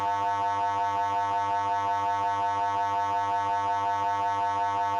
a static didg like sound also a filtered version of my bottle sound